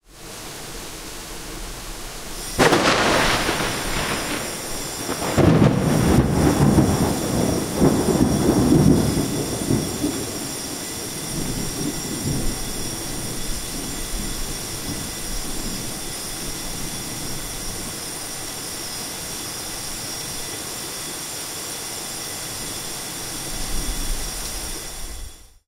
Korea Seoul Rain Thunder Firealarm 1
alarm, raining, south-korea, seoul, bell, thunderstorm, ringing, firealarm, alert, korean, rural, southkorea, thunder, field-recording, korea, city, rain